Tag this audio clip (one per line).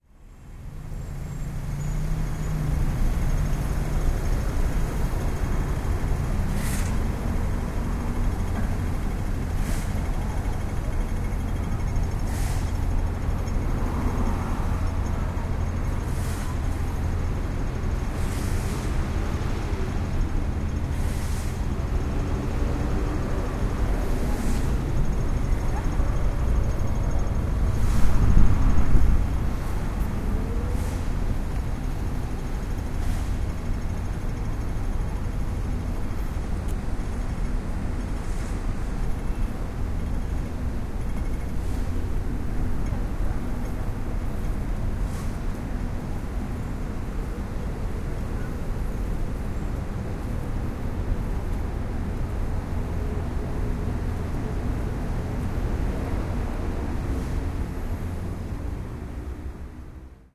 ambience
ambient
atmosphere
field-recording
macao
soundscape